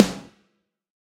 ASRN SNARE 001
Processed real snare drums from various sources. This is a combination of old and modern snare drums.